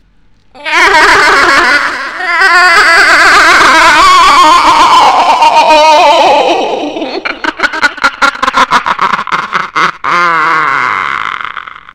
moan5 ECHO LOW PITCH
moan 5 is witch like in low pitch with echo done in audiocity by Rose queen of scream. Chilling sound effect